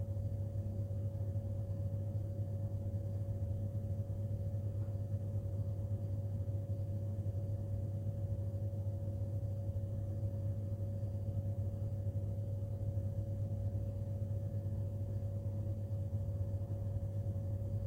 Empty Computer Room Ambience
Some ambience I recorded of a computer I frequently used at Teesside University, recorded with my Samsung Galaxy S21 phone and edited to loop with Audacity 2.4.2.